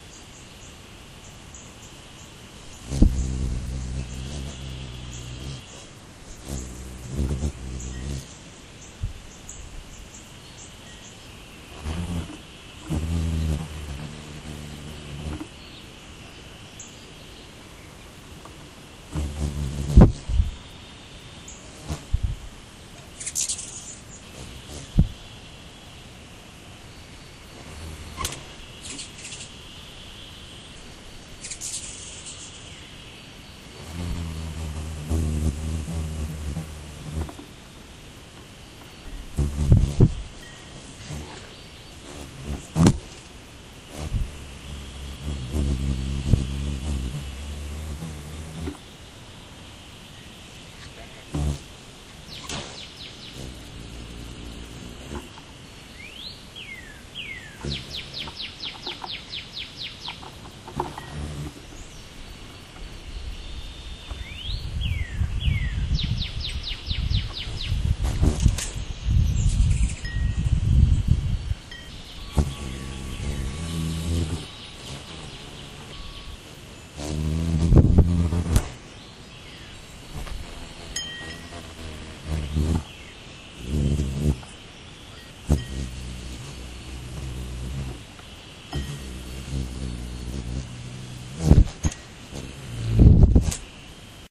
hummingbird fight
A recording of at least 3 male Ruby Throated hummingbirds fighting over our feeder.
hummingbird, fight, bird